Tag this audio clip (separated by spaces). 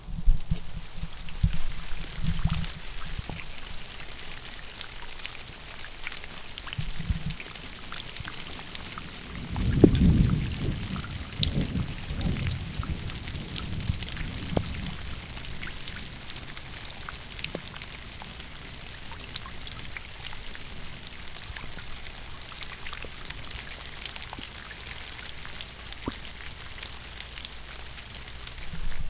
field-recording storm thunder waterfall